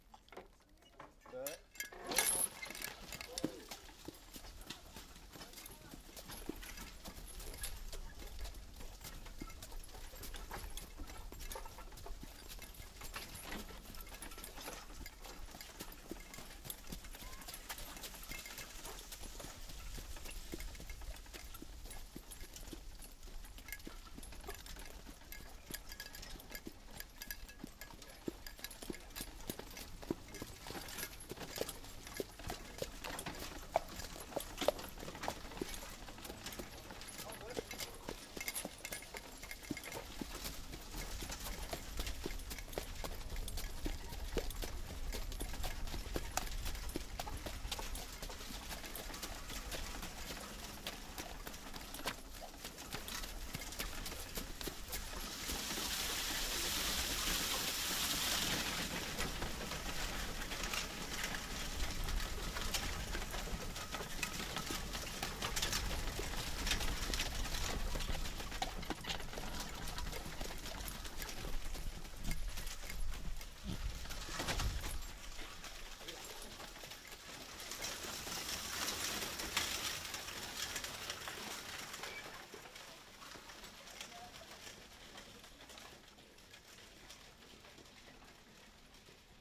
Following a draught horse hauling and four wheeled carriage on a dirt & mud road with a wired boom mic. Original location recording with no processing.